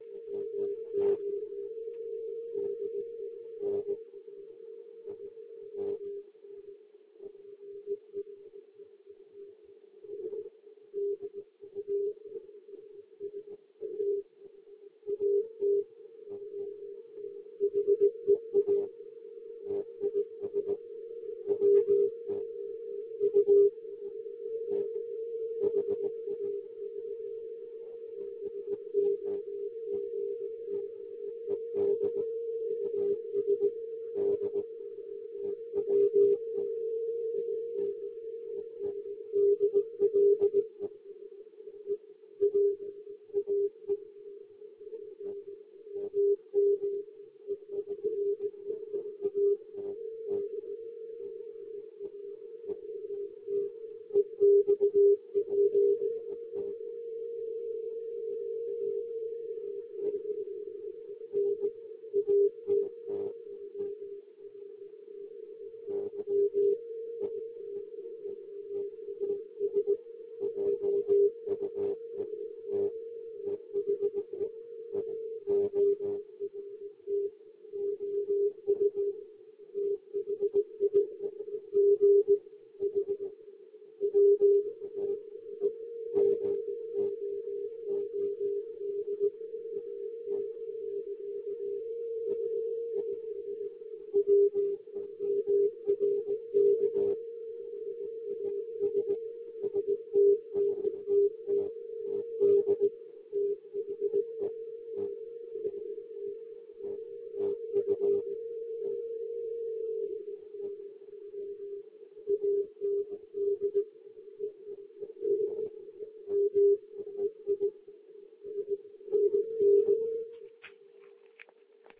W1AW-20120927-0020UTC-3581.50KHZ
W1AW ARRL transmission for all radioamateurs in 80m band. My location JN79ND. Record: Nokia 6230i from TRX loudspeaker.